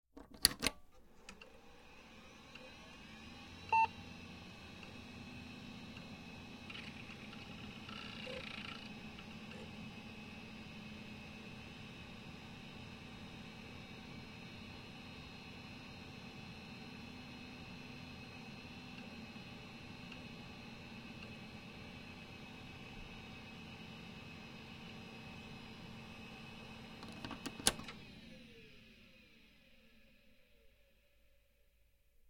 old pc turn on boot turn off
An old pc is turned on and boots, then it is turned off.
Recorded with the Fostex FR-2LE and the Rode NTG-3.
turn-off, personal, boot, old, computer, pc, turn-on